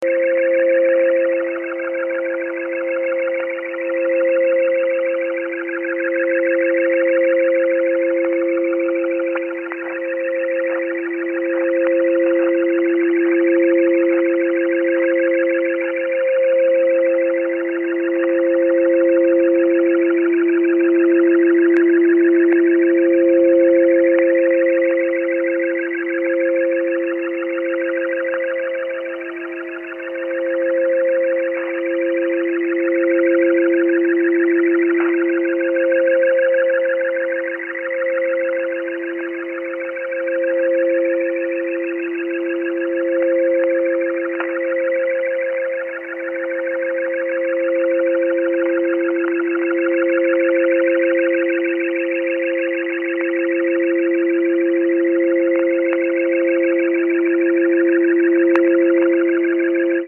Jamming signal in short wave as heard in a Kenwood TS-950sdx receiver - USB mode / 2,7 KHz. BW.
Communications, Jamming, Short-Wave, Radio, Signal